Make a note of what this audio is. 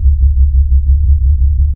SUB BASS SINE D
Korg Polsix with a bad chip
bass, d, droning, modulating, reso